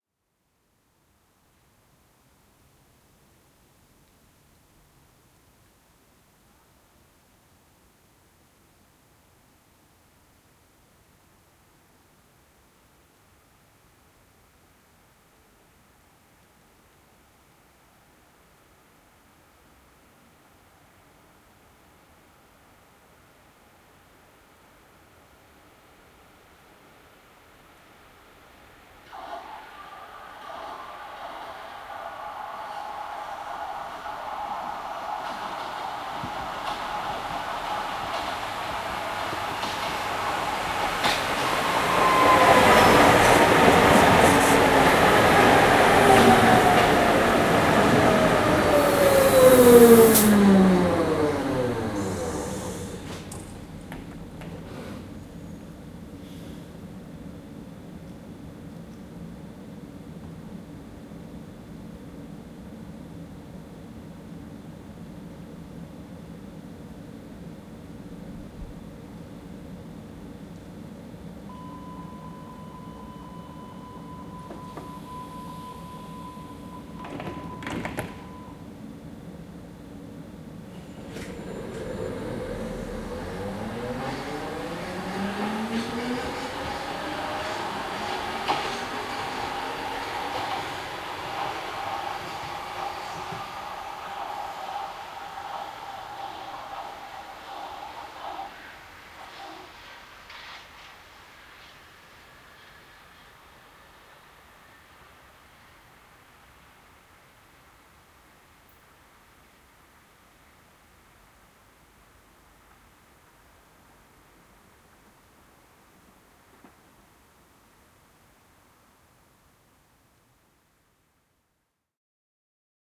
Subway arriving and leaving at Station (Hamburg)
This recording was done at "U-Bahn Buckhorn" in Hamburg at night by Axel S. and me with a Zoom H2n.